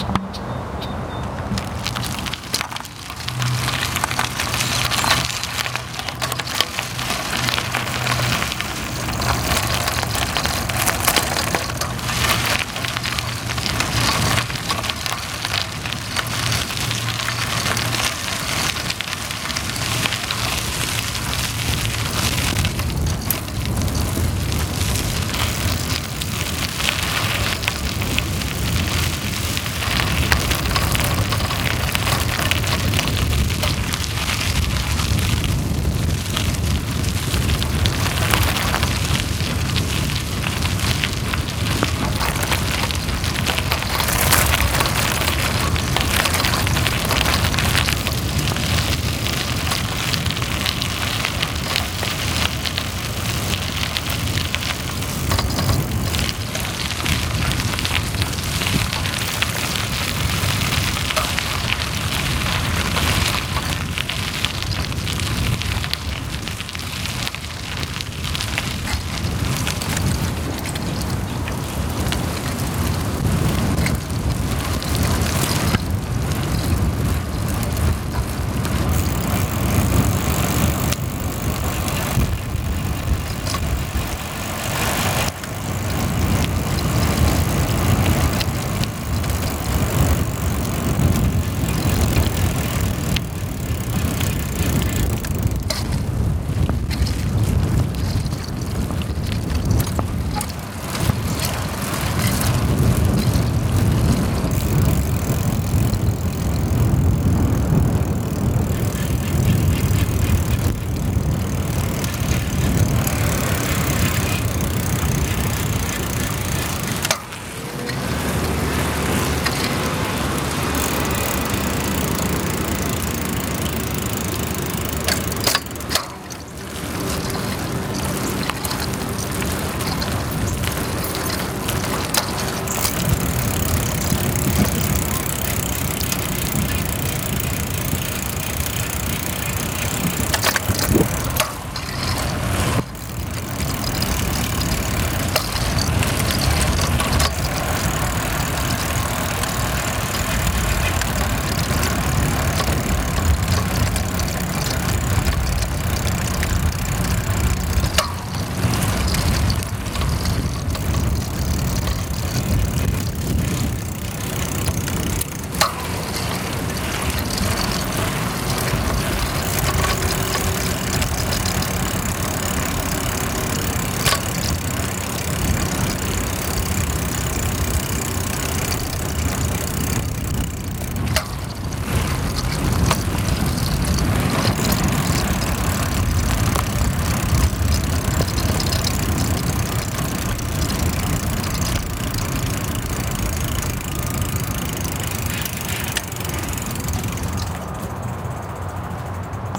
Bicycling Onboard Boardwalk
recorded on a Sony PCM D50
Bicycling, Boardwalk, Onboard